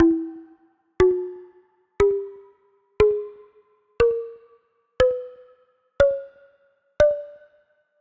Rainforest Scale 1
Pitched percussion scale
Asset, Virtual-instrument, Percussive, Tropical